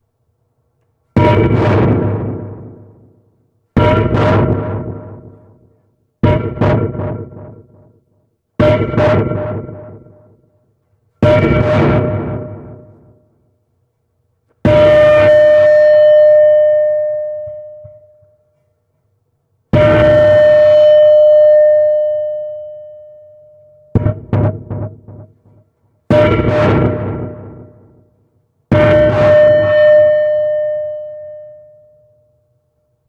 Heavy Steel Pipe 01 Trash2 Around You
The file name itself is labeled with the preset I used.
Original Clip > Trash 2.
scary, sci-fi, metal, steel-pipe, percussion, drop, industrial, clank, hit, smash, clang, metallic, metal-pipe, cinematic, sustained, horror, impact, ringing, resonance, steel, strike, ping, distortion